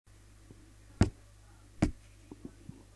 Hitting sound effect! :D
punch, hitting, hit